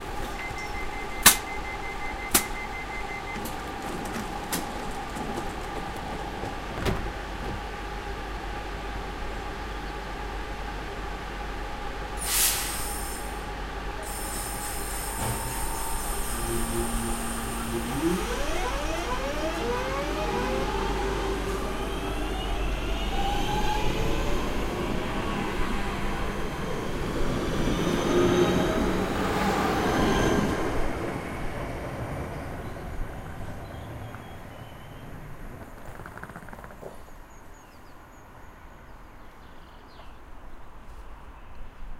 A train depart the station at Levenshulme, Manchester